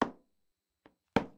Plastic Hit 2
trash can plastic hit punch collision